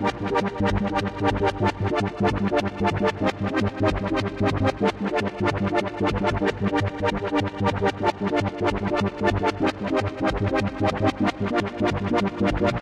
Electric Air 03
distorted, hardcore, techno